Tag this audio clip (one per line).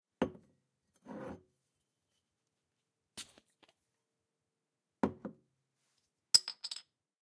bottle
jar
cap